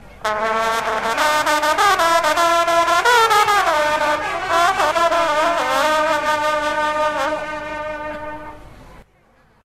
hunting horn players team registered at a hunting horn contest in Montgivray (France)
horn, traditions, hunting, france